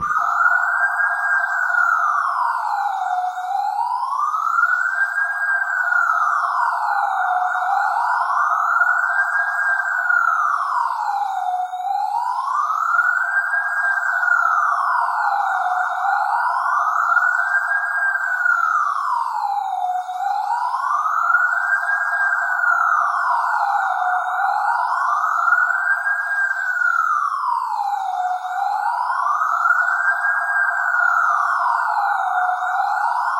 police siren imitation
An imitation of an Australian police siren using synthesizer and a big outdoor-style reverb. The siren consists of two oscillators, one of them loops at 4bars at 115bpm. The other is approx 14 times faster. They both move in same approximate freq range from approx F#5 up to G#6, about an octave.